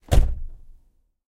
car door slam 35 B
slamming car door B
effect, car, sound-effect, slam, door, close, foley